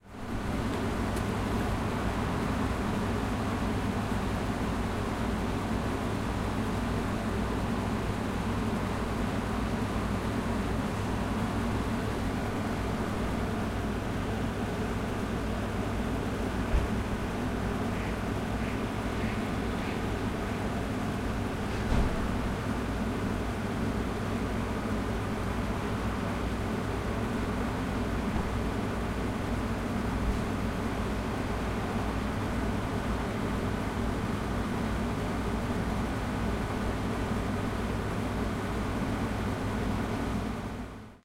tesat fan 170411
17.04.2011: about 21.00. crossroads of Chwialkowskiego and Dolina streets in Wilda district in Poznan/Poland. the noise made by the fan.